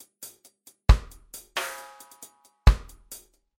Reggae drum loops
loops, reggae, drum